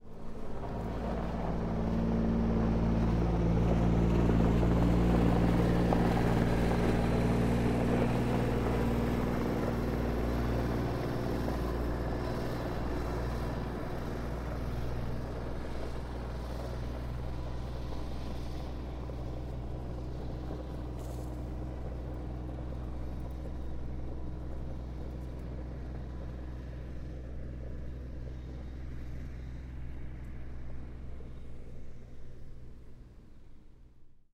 Kawasaki Farm Hauler 01
I recorded this piece of farm equipment as it was passing by. It's a small reinforced golf cart style cart with thick tires, driving over gravel.
Gravel, equipment, Farm, Motor